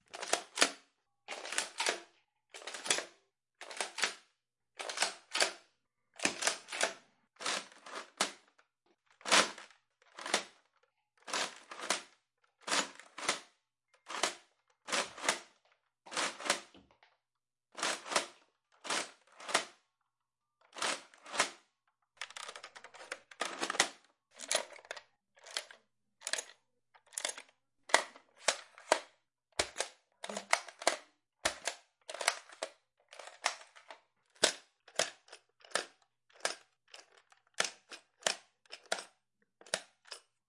Some noises of reloading and handling many Nerf guns